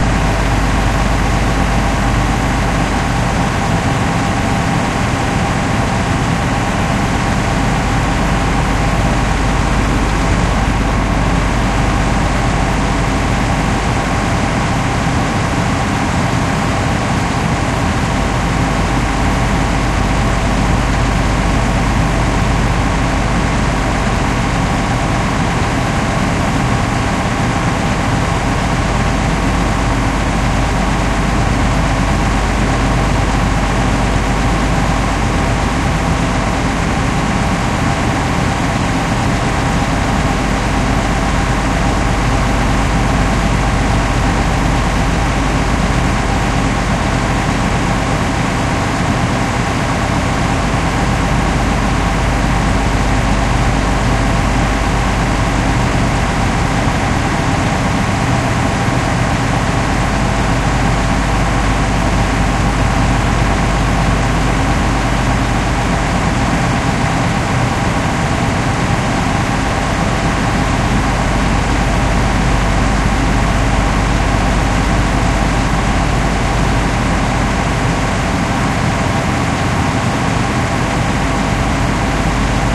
Hopefully the last time I will be in the humiliating position of relying on public transportation to get to work (fingers crossed). Noisy carbon emitting monstrosity.
ambience, auto, bus, engine, field-recording, public, transportation